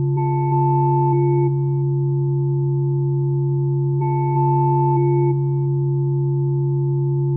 An abstract electronic sound produced using oscillator/Arpeggiator/LFO/delay vst´s and and edited in audition.
perhaps suitable for dark ambient industrial soundscapes / sound design